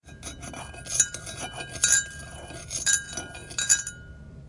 Spoon in mug; close
Metal spoon stirring in an empty mug
metal, mug